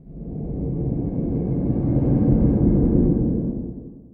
Rocket Landing
it's just the sound of a pot on the carpet :)
airplane, carpet, engine, game, jet, jet-engine, landing, launch, pot, rocket, thruster